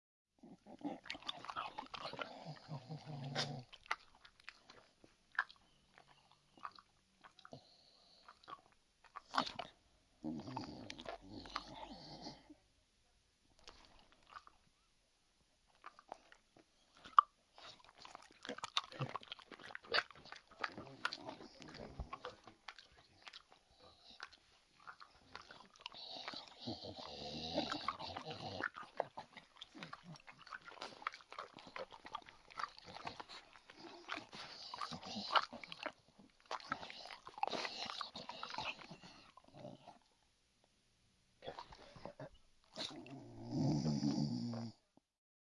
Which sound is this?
Dog eating
Recorded on Marantz PMD661 with Rode NTG-2.
A dog (Staffordshire Bull Terrier) eating very messily and noisily and breathing heavily.